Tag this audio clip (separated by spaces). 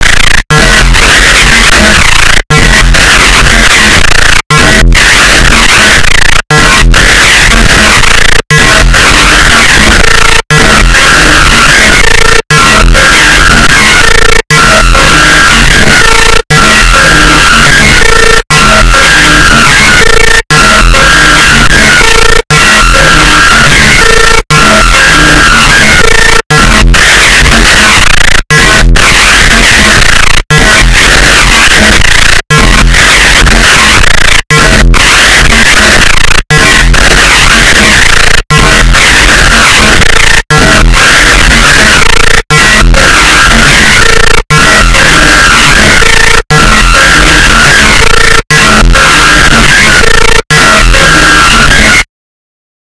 beep beeps noise weird